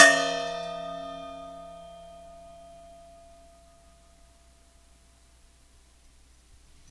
Hitting11-5-SM58-8inAway
Struck from the bottom again whilst hanging vertically from 1 wire, the 11 1/2" bowl were struck on the bottom with the needlenose pliers, with the Shure SM58 mic held approximately 8" away from the interior of the bowl.
SM58, hittingSteel